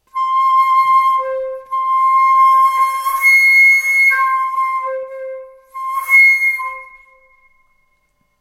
Flute Play C - 09
Recording of a Flute improvising with the note C